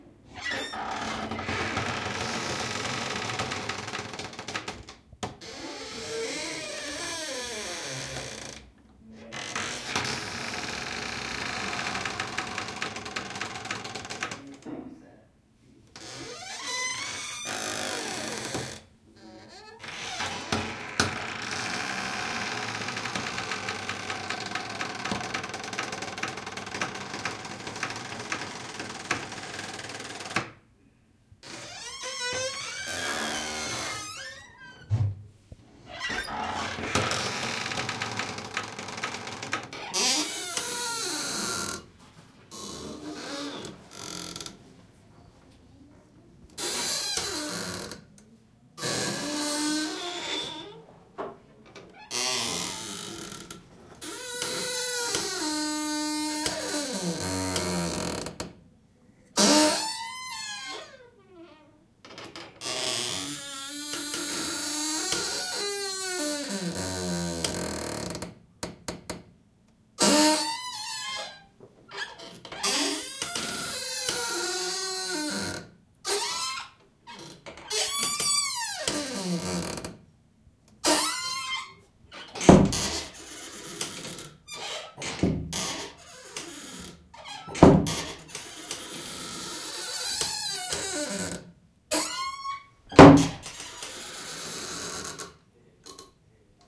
wood door closet cabinet armoir open close creak slow ship hull list
ship, armoir, wood, hull, open, closet, close, cabinet, list